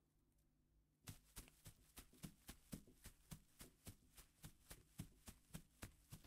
Semi-Fast Steps
Just someone walking semi-fast